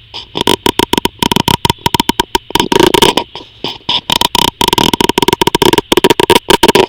piezo friction
friction with a piezo transducer